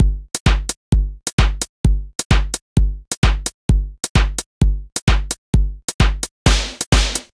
Beat without percussion (130 bpm)
drum, dance, 130-bpm, electro, beat, loop